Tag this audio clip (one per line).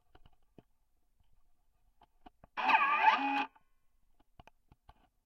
Dispenser
Sanitizer